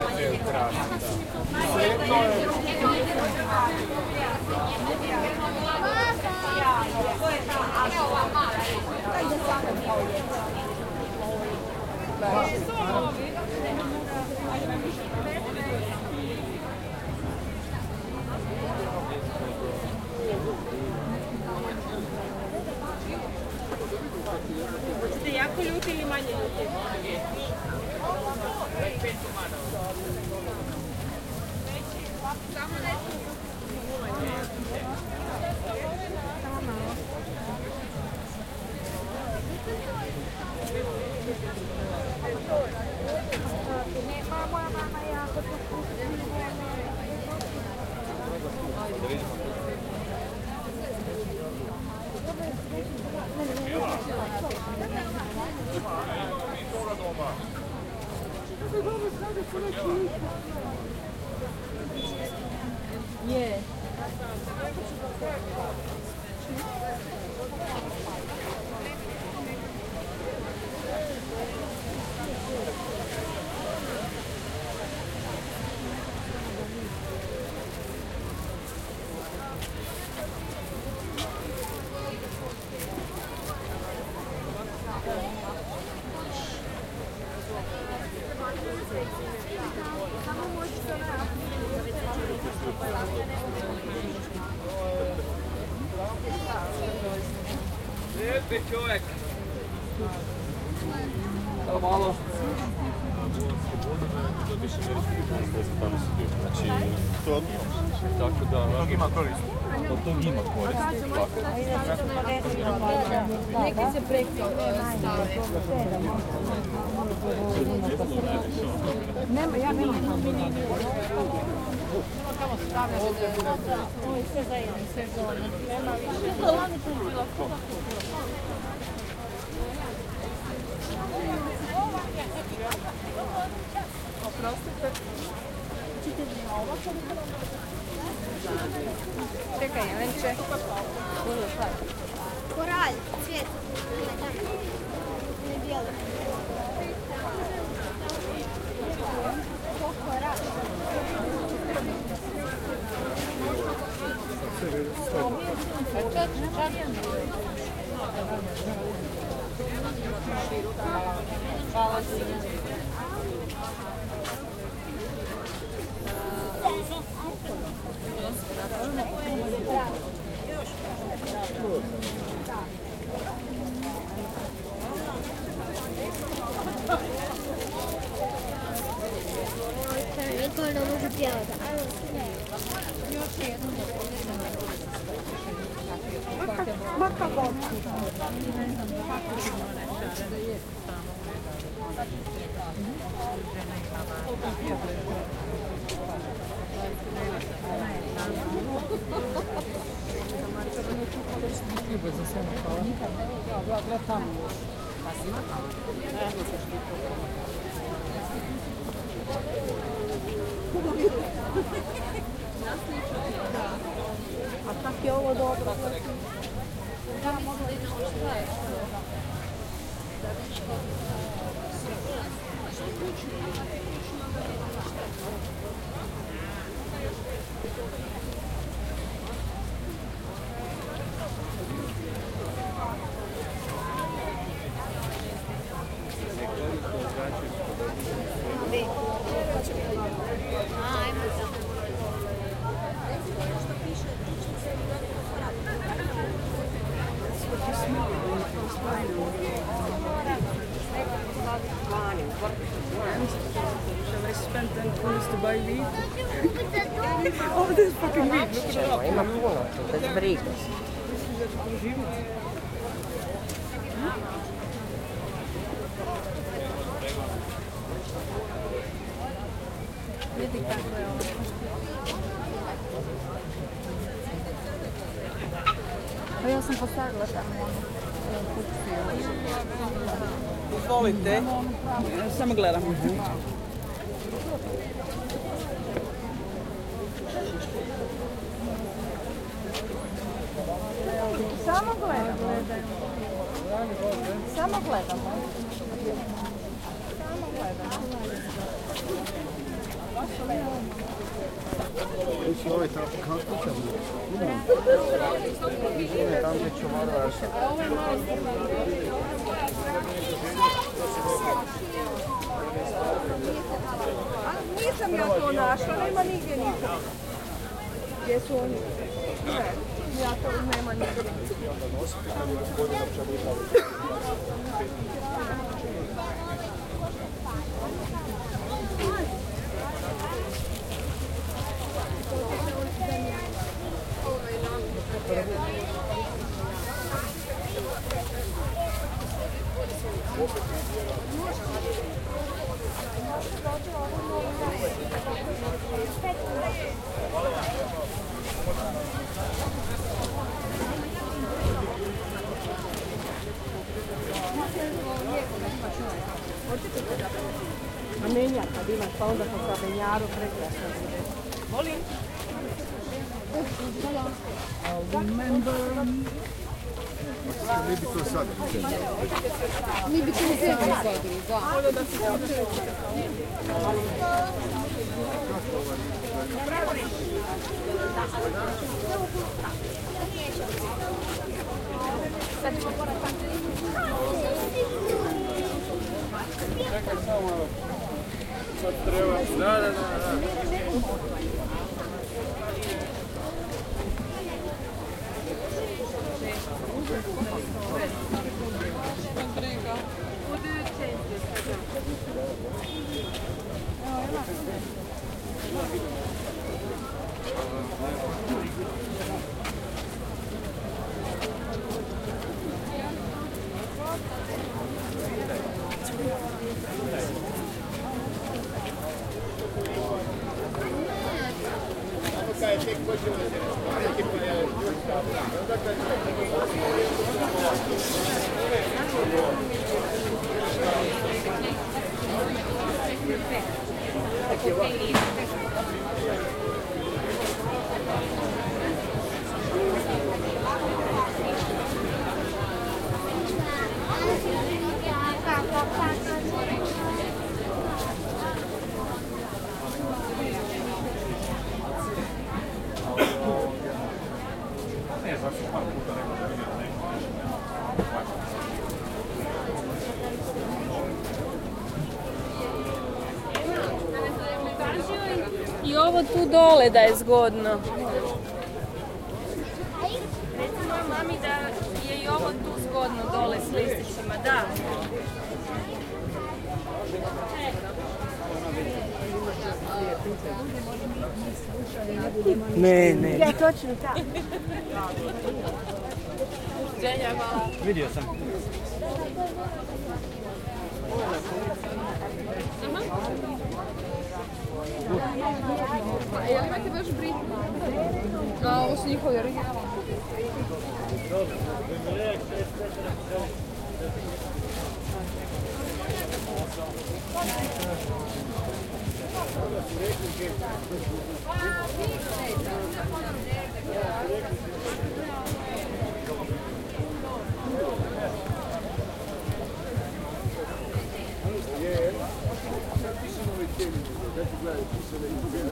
walla market croatian XY
Unprocessed recording of market walla. Language: croatian.
field-recording, market